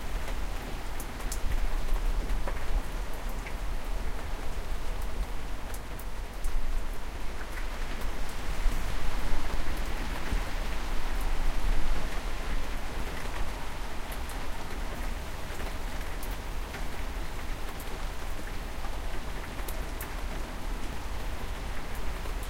Soft Rain Loop
Light rain recorded in England. Distracting drips removed and seamlessly looped.